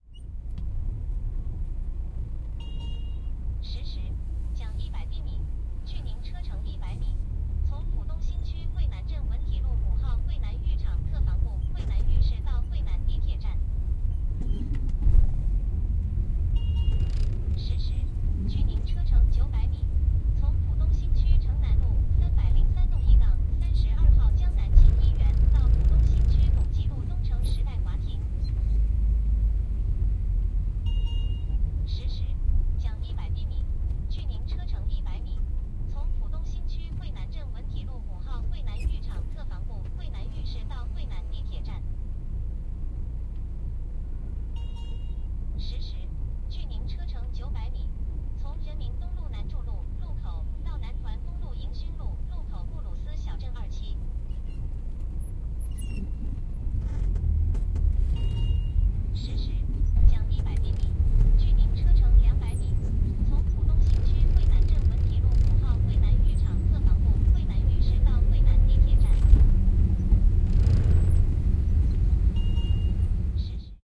This was recorded during a taxi ride on a rainy morning in suburban Shanghai. The sound of the car's acceleration and braking, hitting bumps in the road and turning can all be made out as well as the electronic announcement from the taxi's meter. Some traffic sounds outside the closed windows can also be heard.